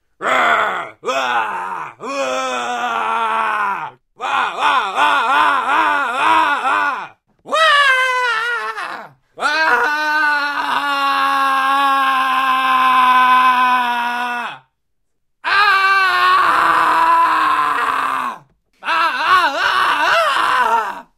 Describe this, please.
Iwan Gabovitch - Scream
Scream of pain, falling or other emotions of a character in a war video game.